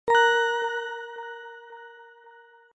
A short, Dainty Stink
cute, digital, effect, electronic, fx, magic, melodic, music, musical, quiet, short, soft, spell, stab, sting, synth, synthesized, synthesizer, transition, twinkle